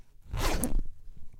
Opening and closing a zipper in different ways.
Recorded with an AKG C414 condenser microphone.
3naudio17, backpack, clothing, uam, zipper